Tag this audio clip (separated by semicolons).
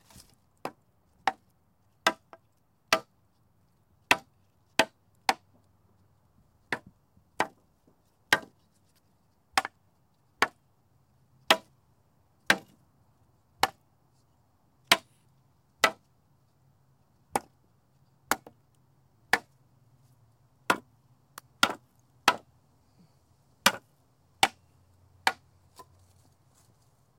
hits; impact; stick; thud; wood